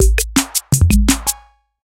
A 808 style break/loop For some reason isn't a real loop. You need to set the loop points.
166bpm, 808, break, minimal, style